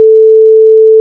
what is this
Example of a simple sine wave that is timed by limiting the number of samples.
Sound generated using the go-sound golang programming library:
as part of the demonstration program: